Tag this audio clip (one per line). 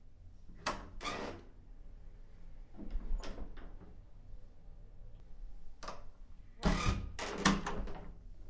doors
closing
bahtroom
foley
Door
basement
opening